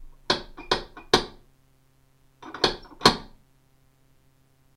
A simple door knock sound - 3 quick 2 slower. In response to a request from rogertudor.